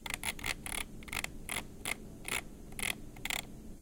004 scrooll wheel
This sound is a recording of a mouse scrolling wheel.
It was recorded using a Zoom H4 recording device at the UPF campus in the 003 aula from tallers.
scroll-wheel, campus-upf, nosie, UPF-CS12, percussion, mouse, office, scrolling, computer